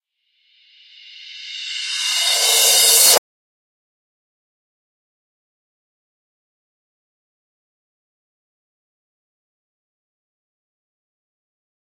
Rev Cymb 17
Reverse Cymbals
Digital Zero
cymbal, cymbals, metal